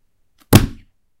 Book Drop - 3
Dropping a book